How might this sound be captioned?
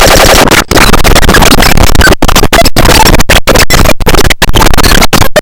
Scrambled eEgss
experimental, circuit-bent, bending, rythmic-distortion, just-plain-mental, coleco, murderbreak, core, glitch